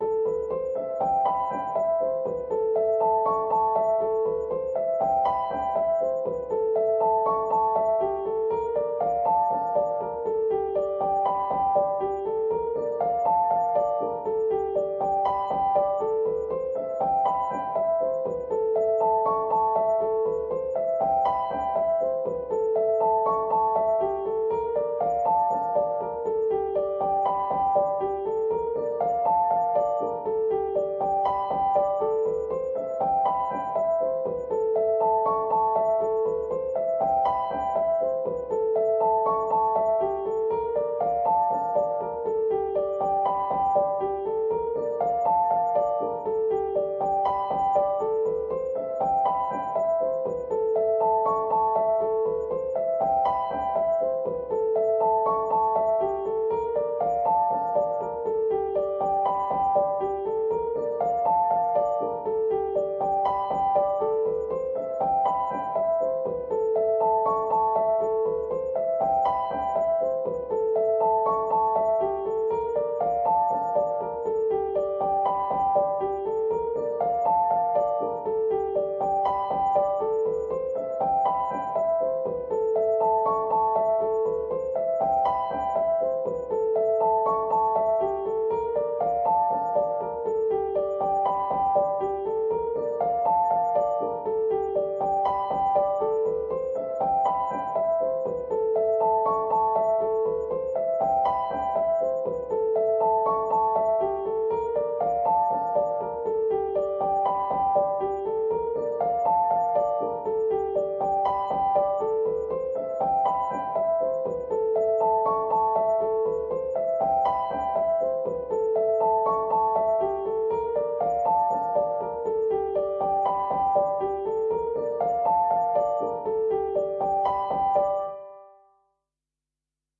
Piano loops 052 octave up long loop 120 bpm
120, 120bpm, bpm, free, loop, music, Piano, reverb, samples, simple, simplesamples